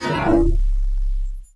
Noisy start that fades into a bassy hum. I then removed the unbelievable noise to see what I ended up with. After that, I cut out the parts that sort of sounded cool and these are some of the ones I am willing to let everyone have.